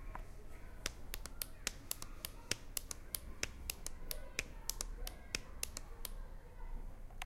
mySound AMSP 10
Sounds from objects that are beloved to the participant pupils at the Ausiàs March school, Barcelona. The source of the sounds has to be guessed.
AusiasMarch, Barcelona, CityRings, mySound, Spain